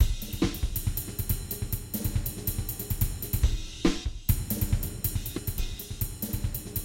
sytherapie jazz hats 5
jazzy loop with hihats and cymbal
cymbal, hihat, jazz, loop